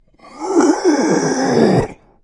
Monster growl 4
creature, creepy, growl, horror, Monster, scary, snarl, terror, zombie